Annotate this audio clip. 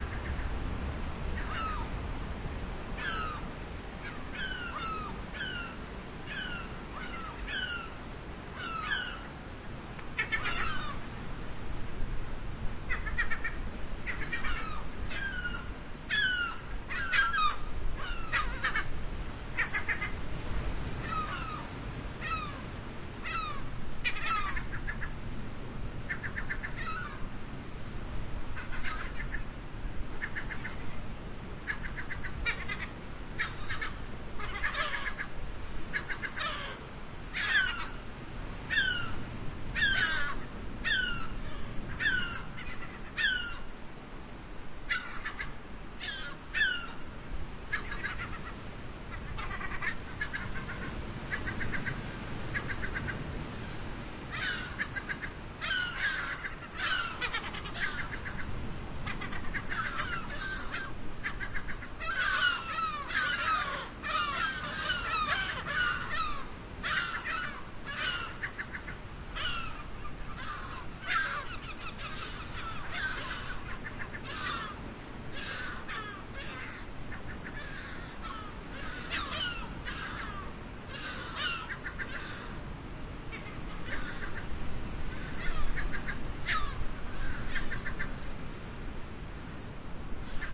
STC moewen
A binaural recording at the cliffs of the chilean coast. Seagulls and distant waves and nobody else than me.